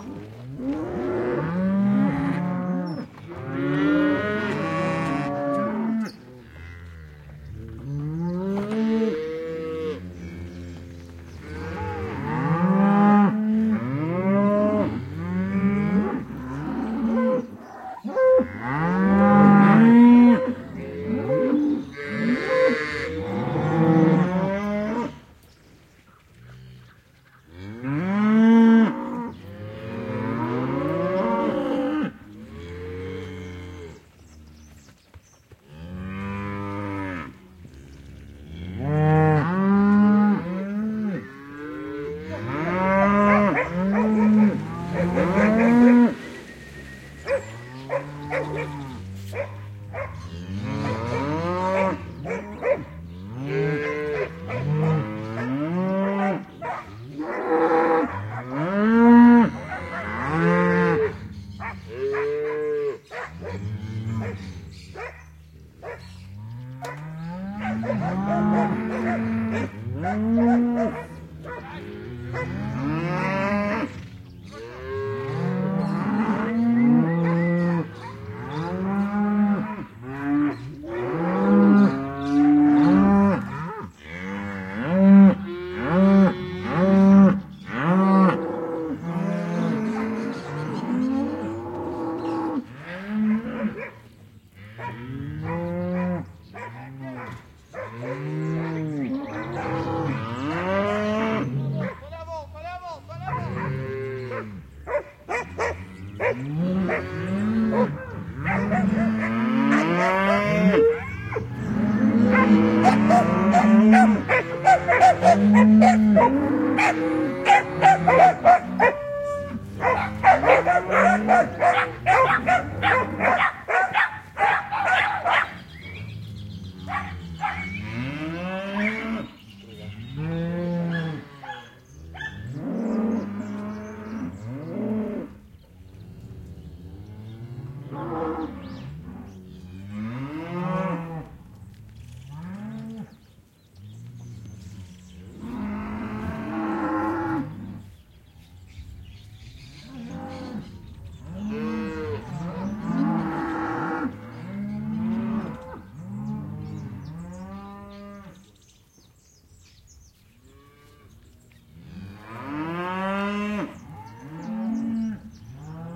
Amb cow dogs cowboy gaucho ST
Herd of cows, with gauchos cowboys whistling and dogs barking.
cowboys, cows, dogs, herd